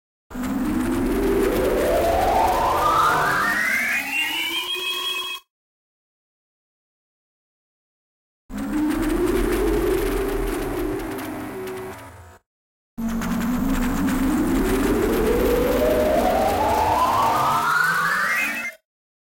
Riser particles x2 HZA 07-03-2019
Rising particles sound, abstract
abstract, effect, efx, fx, granular, particles, rise, riser, sfx, sound-effect